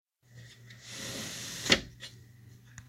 The sound of a metal window sliding closed.